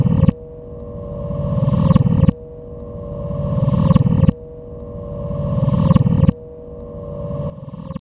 hitting the neck of the bass guitar with my hand (pitch manipulated)
I manipulated the original source sound using reverb(space designer), bounced it, imported to the logic again and reversed it.